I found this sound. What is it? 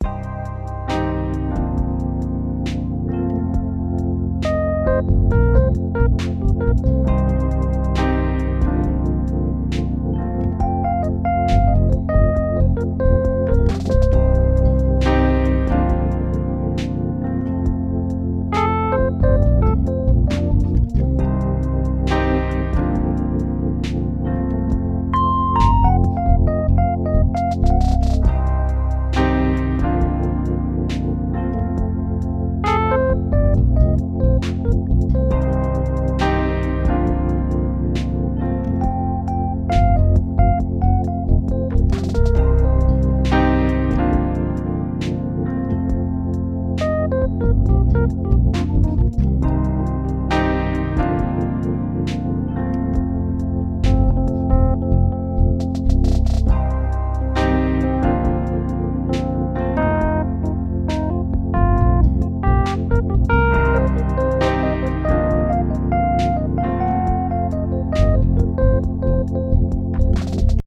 Smooth 68.5 CM
These loops are a set of slow funk-inspired jazz loops with notes of blues overlaying a foundation of trap drums. Slow, atmospheric and reflective, these atmospheric loops work perfectly for backgrounds or transitions for your next project.
atmosphere, funk, loops